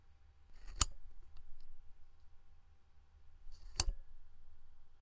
A quick recording of a desk lamp being turned on and off. No processing. Recorded with a CAD E100S into a Focusrite Scarlett 2i2.